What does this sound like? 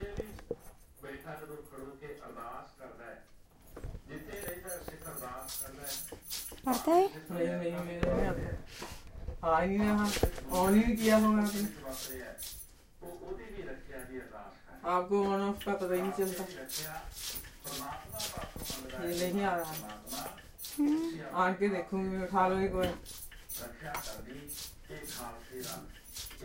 Janek Peel carela metalbracelets hindi Sonal SikhTV
This is part of a series of workshops done in collaboration with Casa Asia, that attempt to explore how immigrant communities in Barcelona would represent themselves through sound. Participants are provided with recorders that they can take with themselves and use daily, during a period of time.
In the workshop we reflect collectively on the relation between the recorded sounds, and their cultural significance for the participants. Attempting to not depart from any preconceived idea of the participant's cultural identity.
Janek peeling carela, an Indian vegetable, in the kitchen. We can hear the tinkling of her metal bracelets. She speaks with Sonal in Hindi. Sikh religious TV in the background.